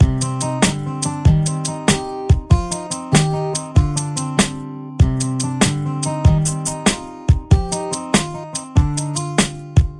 Loop NoNeedToWorry 01
A music loop to be used in storydriven and reflective games with puzzle and philosophical elements.